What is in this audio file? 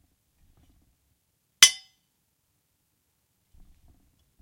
Union Sword Clash 7
Crappy Replica of a Civil War Union cavalry sword. All of these are rough around the edges, but the meat of the sound is clear, and should be easy enough to work with.
Weapon, Sword, Civil-War, Clash, Action